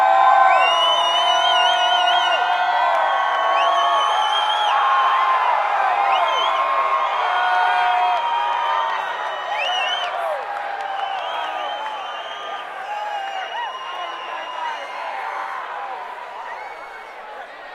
crowd ext cheering whistling crazy
cheering, ext, crowd, crazy, whistling